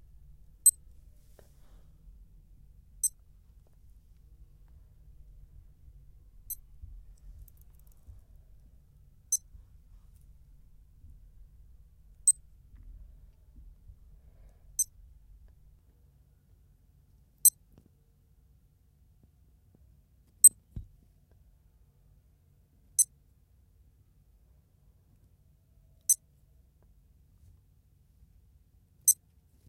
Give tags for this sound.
Beep
casio
digital-watch
watch

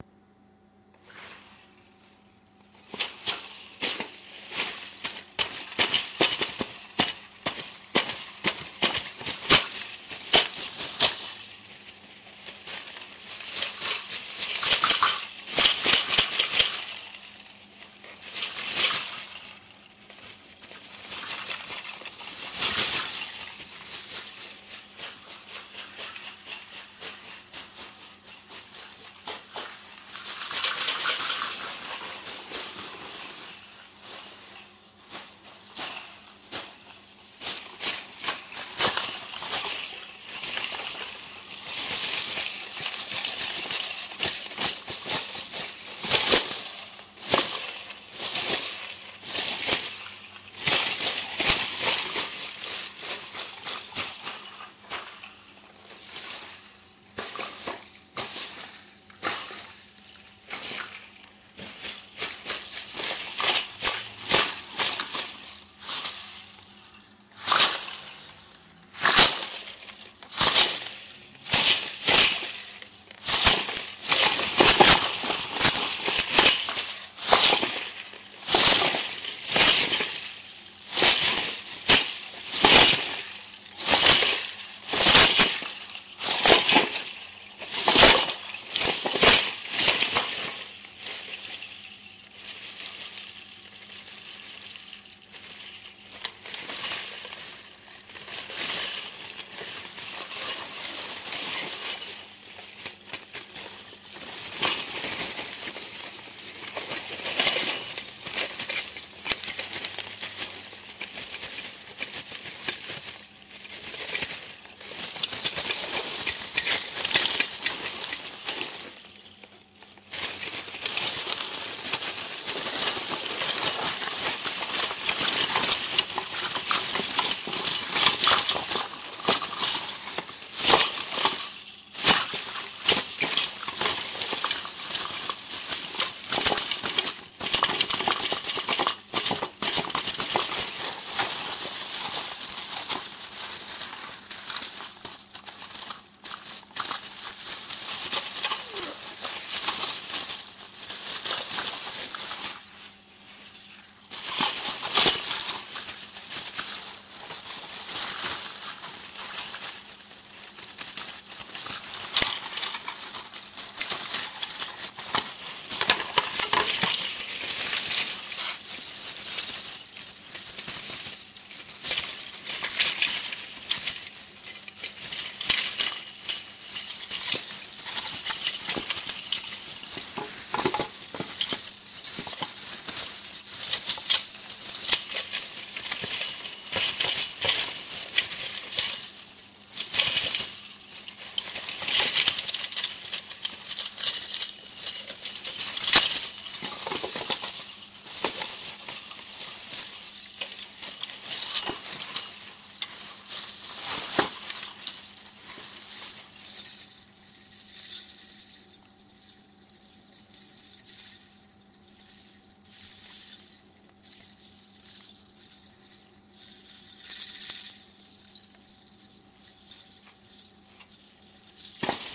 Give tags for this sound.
annettes
aunt
house
jangle
jingle
mac
recordpad

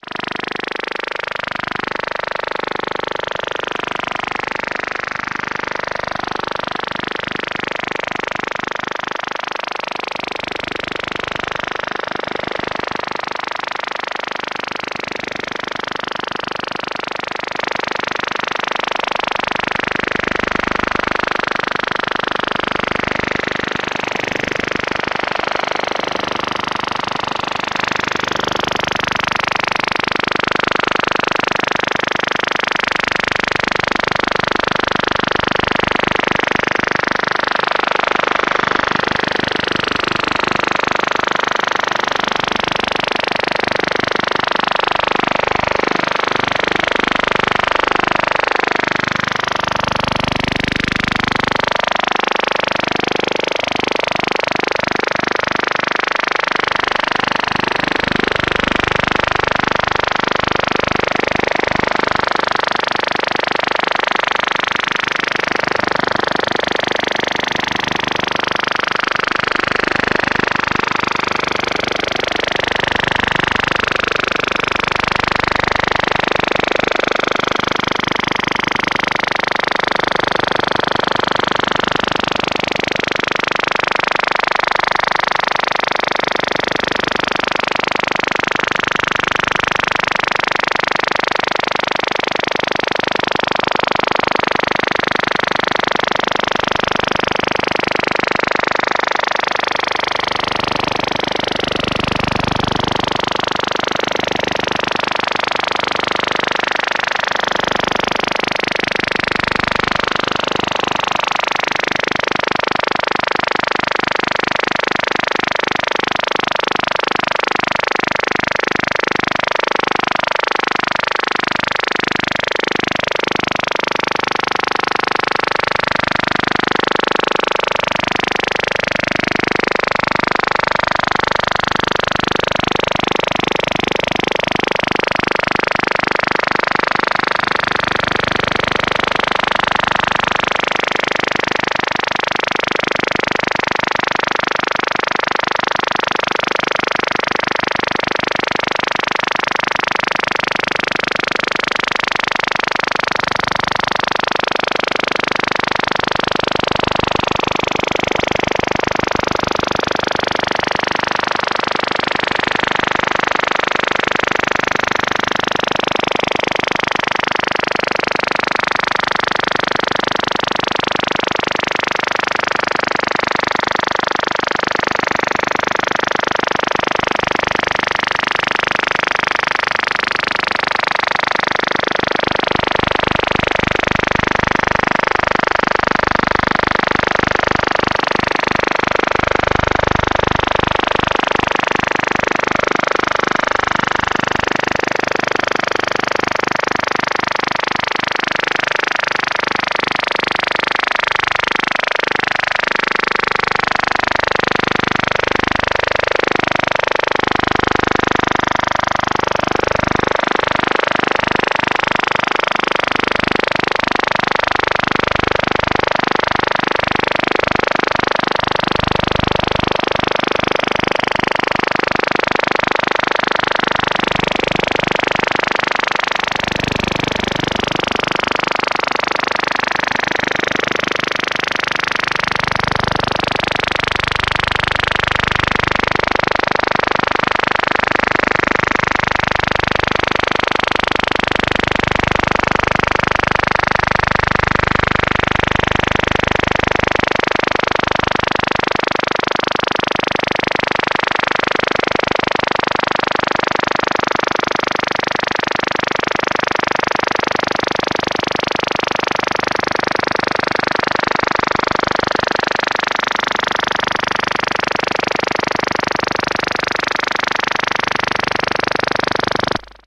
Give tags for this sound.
noise
electronic
signal
shortwave
radio
interference
short-wave
dxing

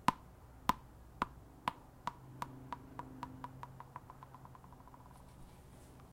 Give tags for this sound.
bouncing
outdoor
field-recording
patio
ball
atmosphere

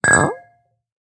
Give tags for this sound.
bottle
clink
glass
wine

